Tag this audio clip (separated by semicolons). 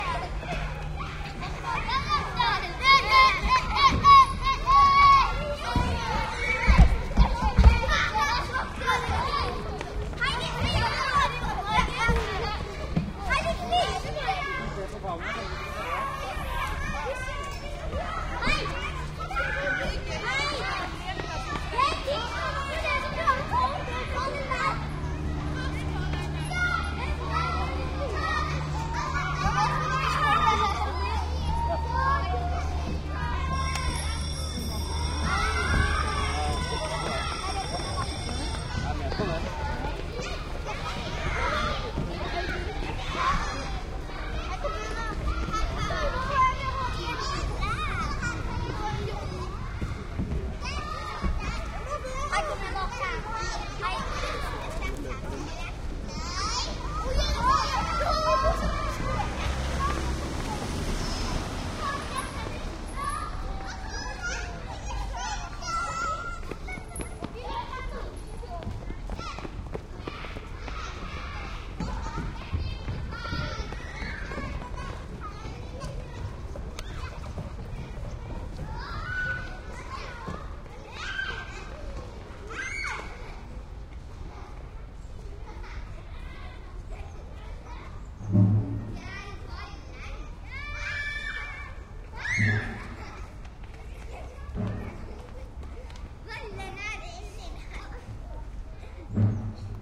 scream; Bergen; screaming; Norwegian; Elemtentary; School; Norway; bell; shouting; play; kindergarten; school-yard